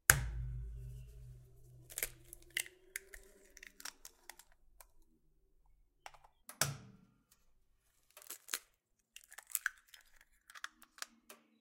Cracking Eggs Into a Bowl
Cracking 2 large eggs into a bowl.
CRACKING-EGGS, CRACKING, EGGS, EGG